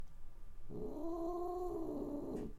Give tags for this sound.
growl anger pet howl cat noise fury

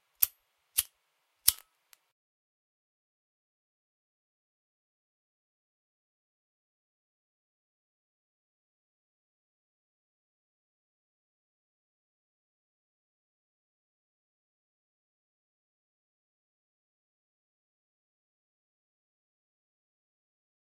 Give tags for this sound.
lighting lighter fire

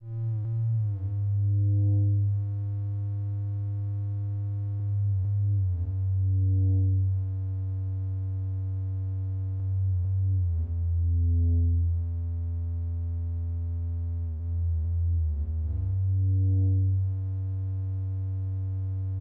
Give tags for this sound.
sub loop melodic free 12 sound organic nails

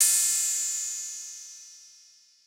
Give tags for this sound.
abl drums hihat hit metal percussion pro realism softsynth tb-303

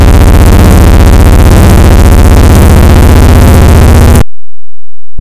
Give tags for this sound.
drumloops drums idm electronica rythms breakbeat processed acid experimental sliced glitch electro extreme hardcore

